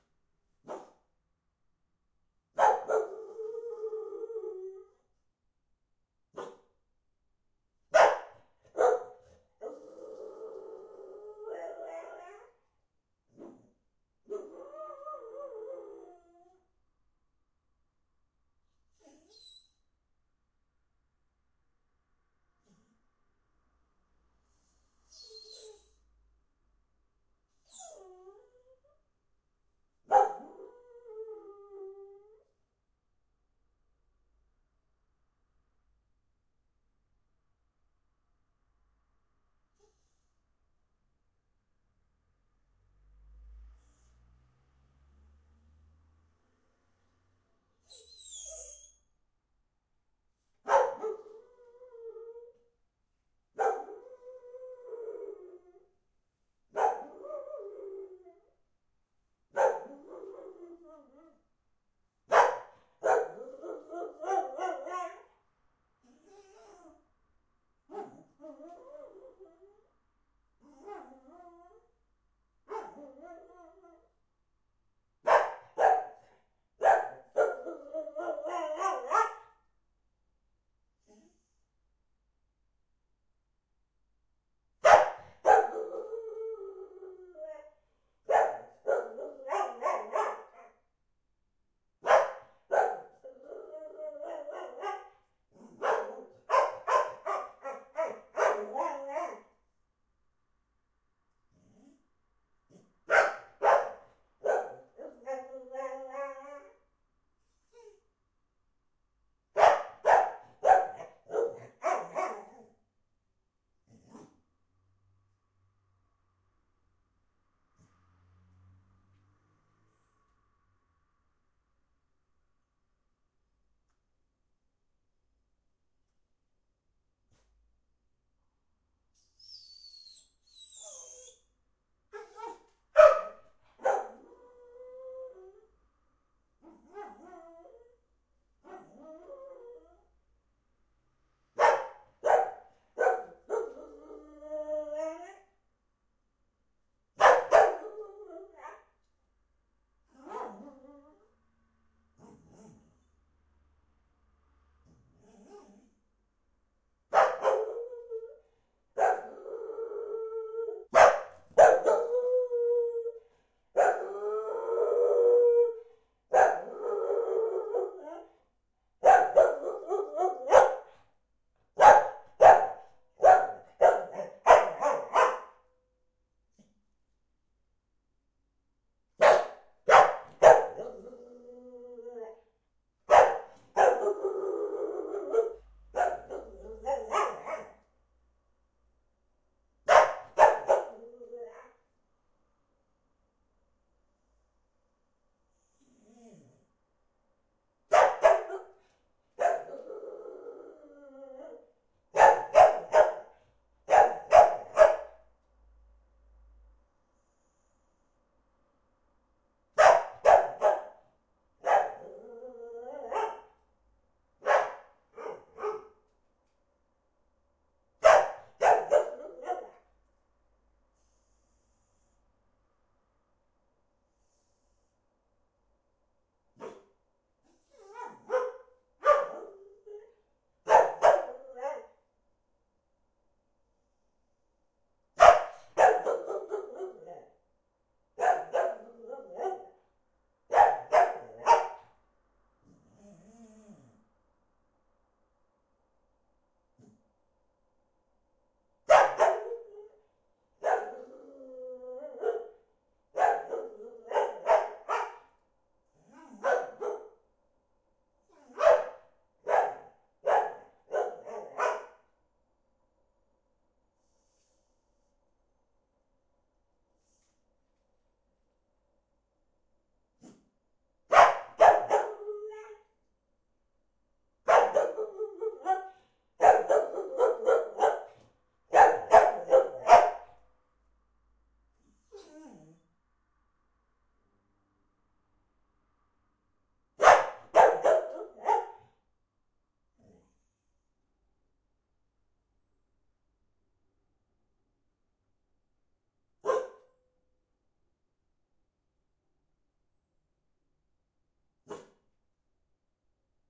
dog max whine howl bark 2
my dog in the hallway, recorded while I'm away. He's got separation anxiety, so sadly enough he vocalises his emotions. This recording is part of the process of understanding him and finally hopefully help him dealing with being alone sometimes.
recorded with a tascam DR100